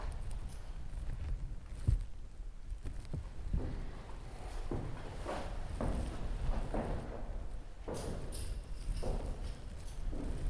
Female Latvian prison guide keys heels
The female guide at Karosta Prison Museum walks in heels and jangles keys
keys, jangle, Female, jangling, Latvian, prison, heels, guide